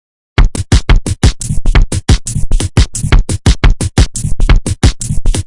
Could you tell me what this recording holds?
Drum and Bass Beat
Its a shit beat i made in minutes. I will never use it, but you like it i guess.
I made by making a house beat with strange distortion, speeded up to 175 bpm and glitched.
175, Drum, BPM, Bass, Beat, Neurofunk